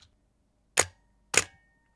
Nikon D800 Shutter 1 2 Sec noLens
The Sound of the Nikon D800 Shutter.
Without Lens.
Shutterspeed: 1 / 2
Camera, D800, Kamera, Lens, Mirror, Nikon, Shutter, Sound, Speed